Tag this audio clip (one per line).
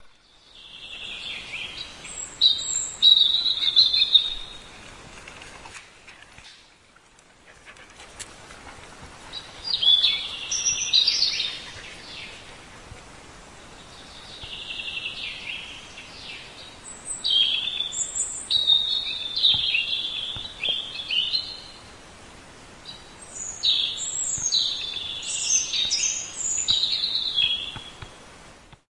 birds
bird-sing
field-recording
forest
jelenia-gora
low-silesia
mountains
nature
poland
sobieszow